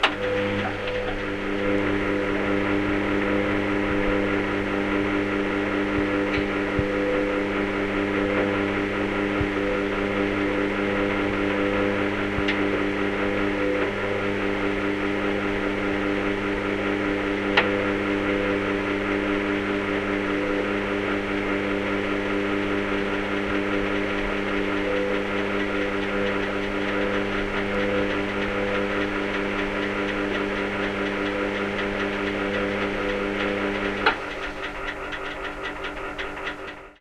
Contact mic recording
Field, contact, mic, recording